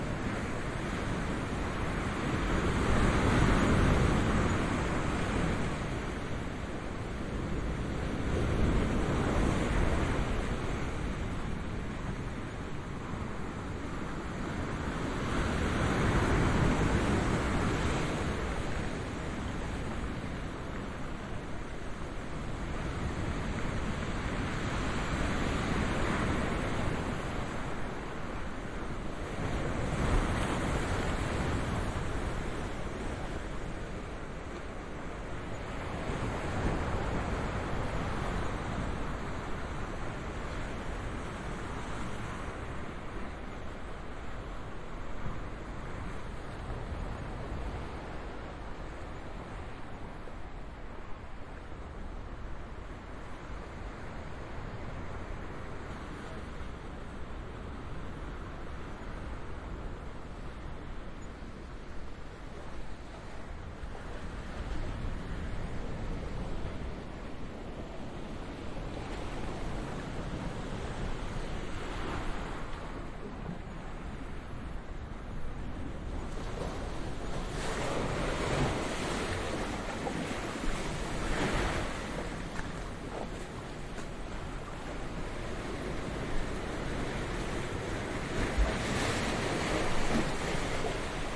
porto 19-05-14 quiet to moderate waves on sand and rock beach walking
Quiet day, close recording of the breaking waves.
atlantic, beach, breaking, cavern, Duero, ocean, Porto, walking, wave, waves